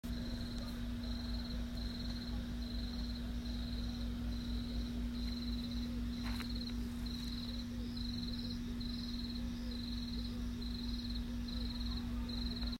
Some crickets chirping in the evening
chirping, crickets, insects